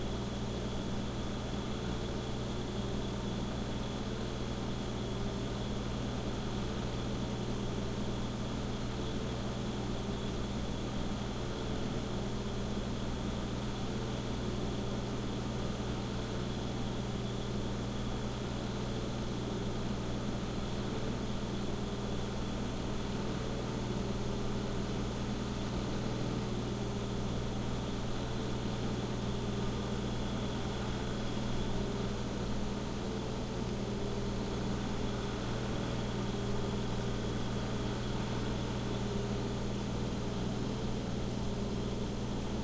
Brief recording of recent rainstorm in Bay Area, California, taken with Tascam DP-008ex stereo onboard condenser mics.